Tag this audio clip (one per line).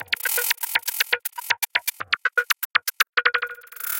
loop
drumloop
beat
dance
electro
electronic
rhythmic
granular
120BPM